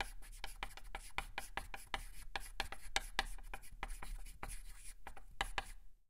writing - chalk - center 01
Writing with chalk on a chalkboard.